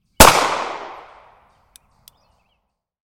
22 magnum pistol shot
A 22 magnum pistol being fired.
22-magnum, firing, gun, gunshot, handgun, pistol, shooting, shot, sidearm, weapon